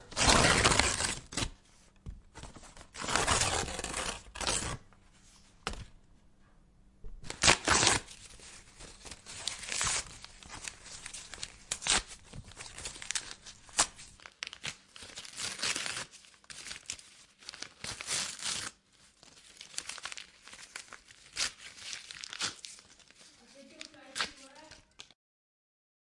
Tearing paper up in many smaller pieces